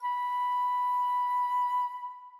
Flute Sustained B
These sounds are samples taken from our 'Music Based on Final Fantasy' album which will be released on 25th April 2017.
Flute, Sample, Music-Based-on-Final-Fantasy, Reed, B